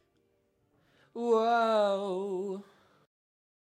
voice sample of a backing session singer person friend of mine who was messing about and i liked it so i used it
used a se electronics se2200a, no pop shield
aah, backing, sample, voice, yeh